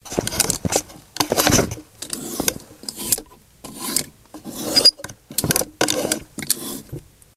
Clothes & hangers moving in a wardrobe
A sound effect of clothes being moved along the wardrobe rail
rail, clothes, clothing, hanger, wardrobe, closet